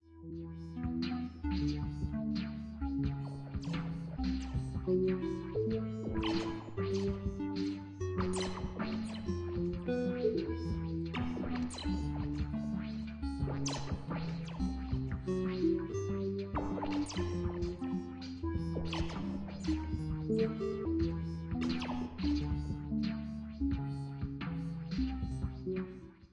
Ambient Cave
ambient, bizarre, cave, dreamlike, echo, reverb, unearthly